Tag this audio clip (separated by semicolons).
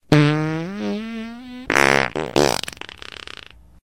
flatulence; gas; human; fart